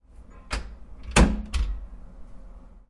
Door Shut Metal Click Medium
Click, Door, Metal, Shut